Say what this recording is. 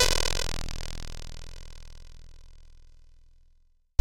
This is a sample of electronic interference. It was created using the electronic VST instrument Micro Tonic from Sonic Charge. Ideal for constructing electronic drumloops...